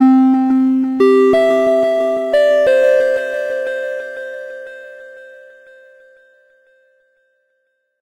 90 bpm ATTACK LOOP 3 square sine melody 2 mastered 16 bit
This is a component of a melodic drumloop created with the Waldorf Attack VSTi within Cubase SX.
I used the Analog kit 1 preset to create this loop, but I modified some
of the sounds. It has a melodic element in it. The key is C majeur. Tempo is 90 BPM.
Length is 2 measures and I added an additional measure for the delay
tails. Mastering was done within Wavelab using TC and Elemental Audio
plugins.
90bpm, electro, loop, melodic, melodyloop, sine, square